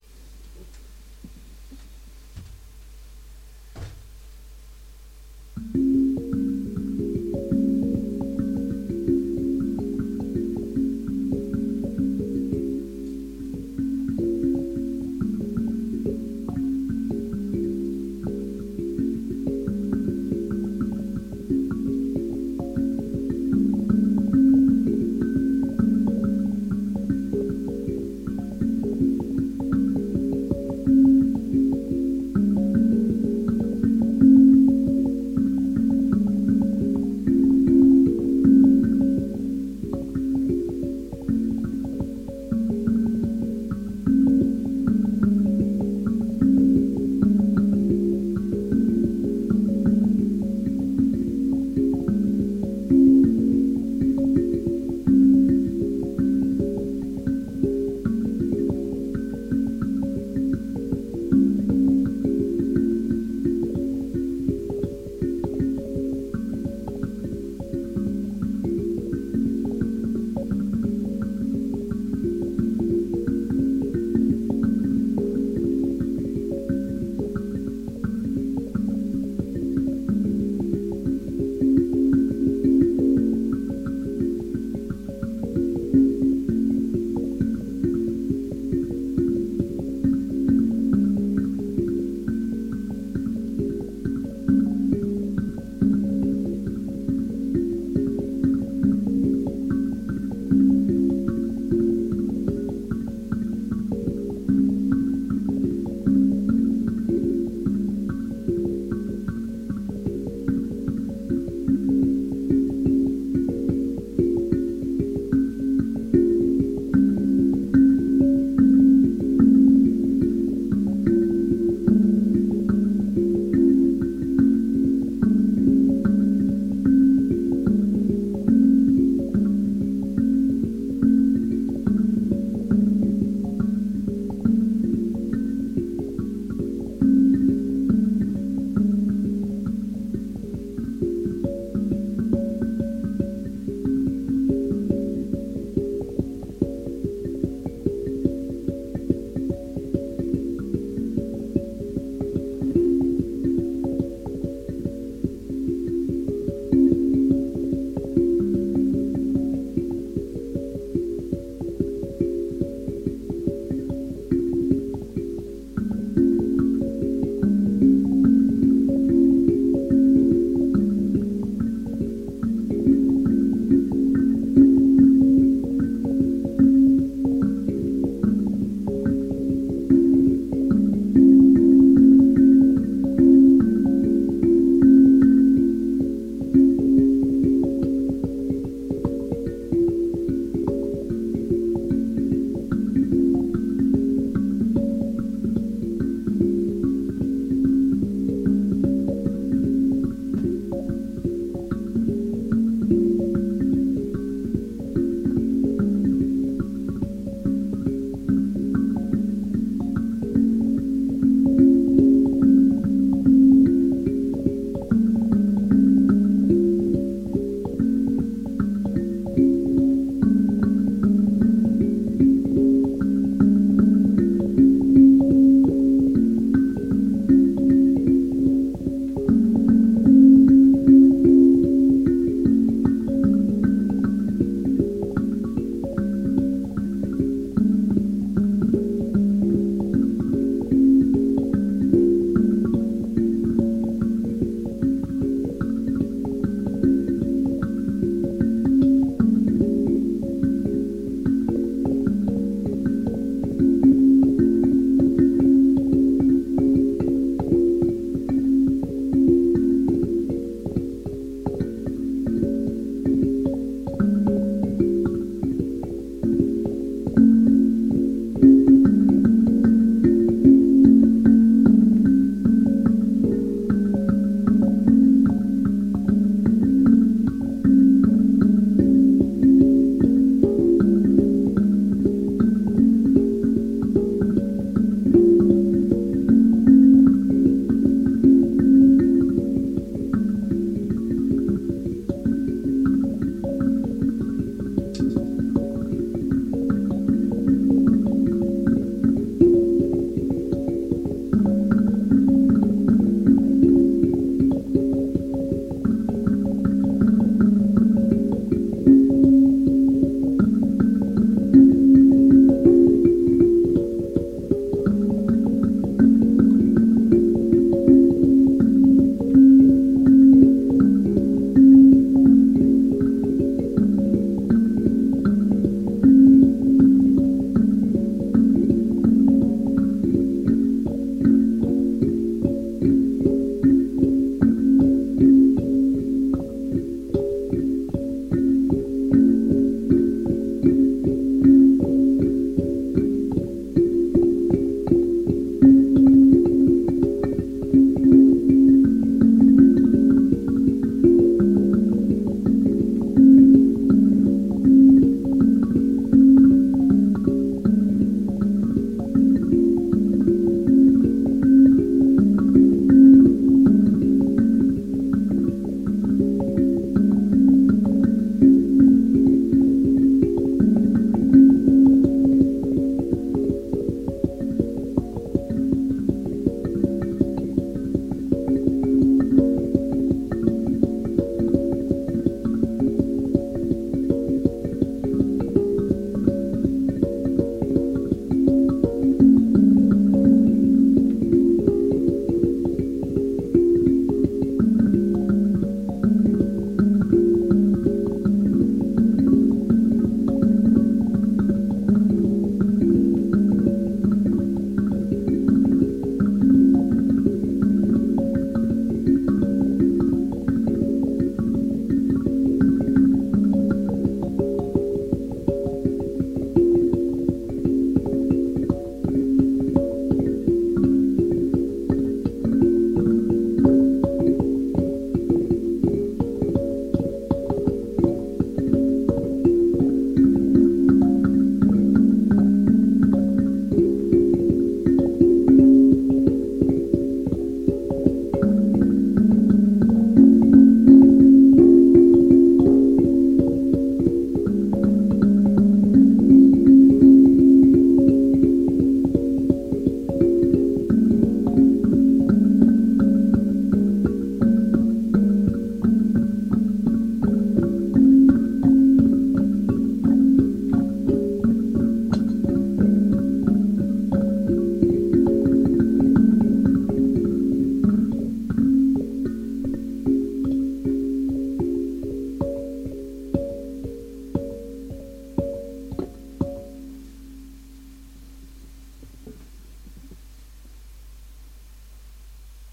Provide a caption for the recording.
I want honor the Red Cross with this simple arrangement. Played on a modified kalimba. No 'SoundShop'.